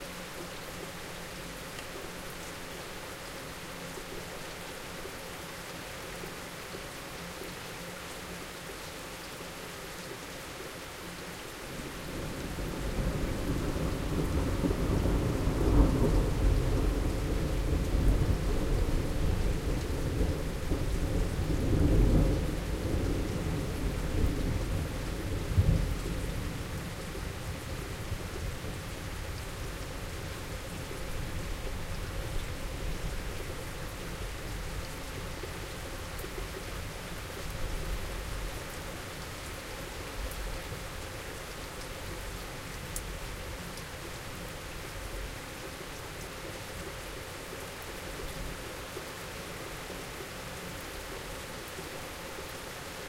Thunderstorm in the night. A lots of rain and thunders.
thunderstorm, lightning, field-recording, rain, nature, thunder, rainstorm, weather, storm, thunder-storm